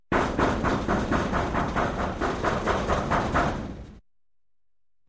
door metal knock heavy bang from other side
recorded with Sony PCM-D50, Tascam DAP1 DAT with AT835 stereo mic, or Zoom H2
bang, door, from, heavy, knock, metal, other, side